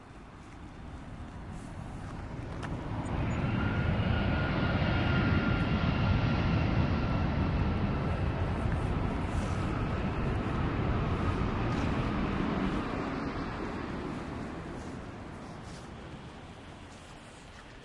20070825.narsarsuaq.airport.02
airplane passing by
airplane, field-recording